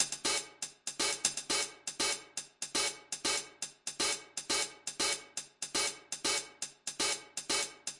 hihat loop 120bpm01-02
hihat loop 120bpm
120bpm, drum-loop, drums, percs, tinny